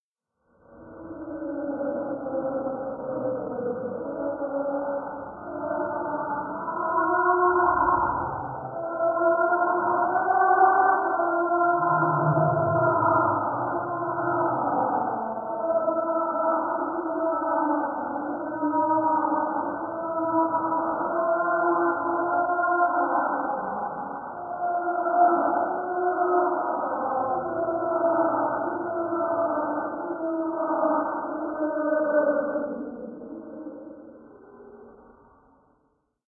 Hell screams
Spooky screams from Hades. Hey! It’s where sinners get roasted! Sample generated via computer synthesis.
Hell, Voices, Screams, Sci-Fi, Strange, Spooky, Creepy